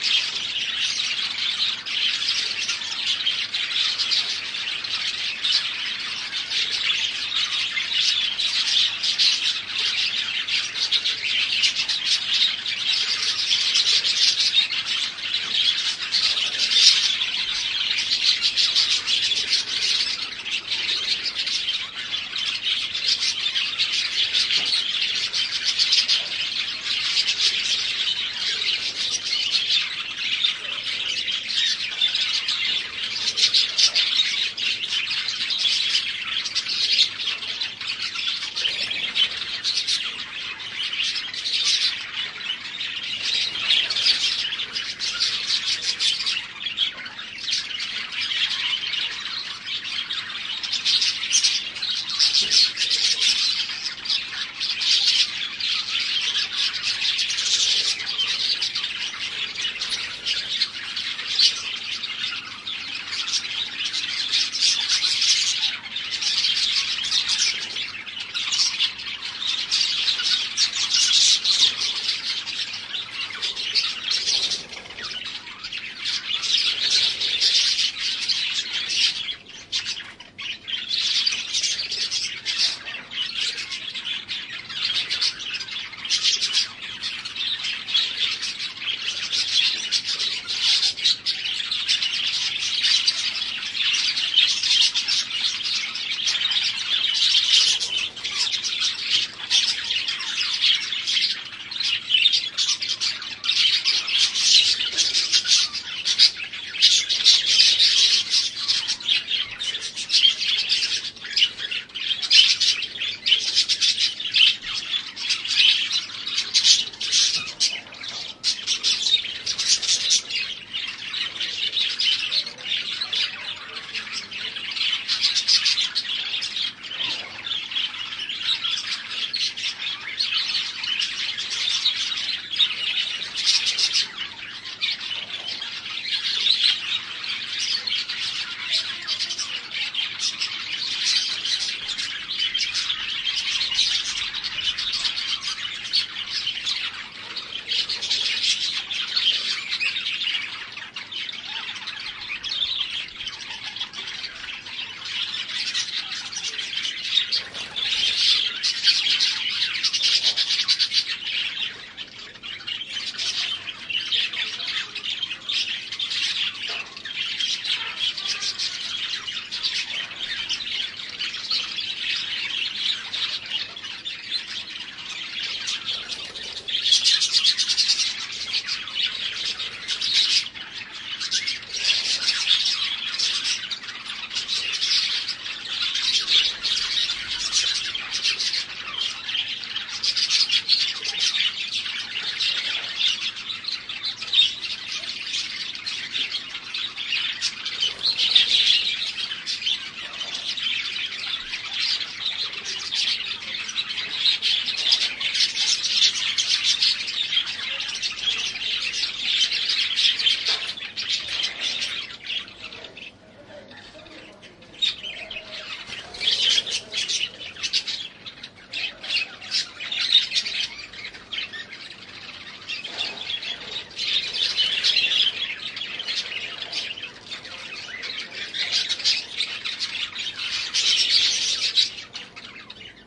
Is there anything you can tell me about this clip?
This is the sound of around 100 budgerigars in a pub garden aviary, Bath, England.
Pub-garden, Aviary, Budgerigars